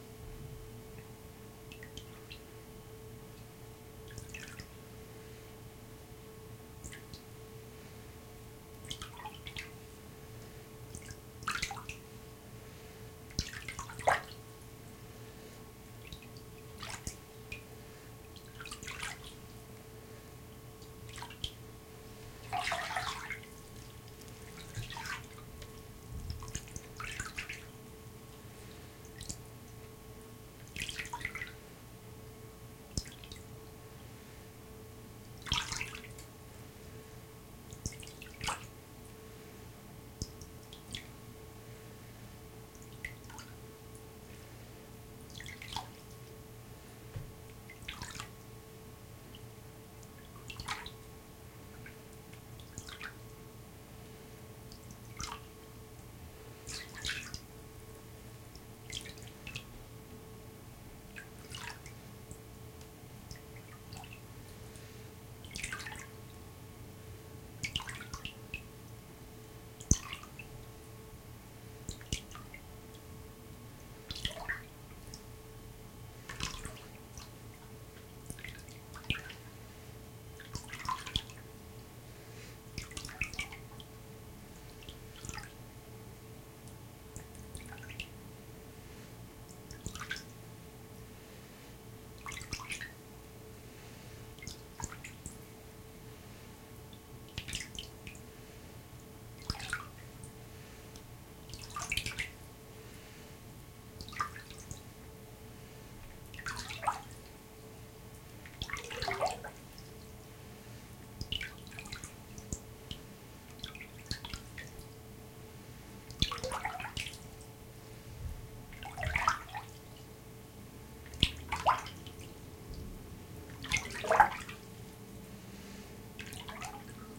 Fairly fast-paced paddling in a small plastic utility sink, probably good for a canoe or rowboat. Originally recorded for use in a play.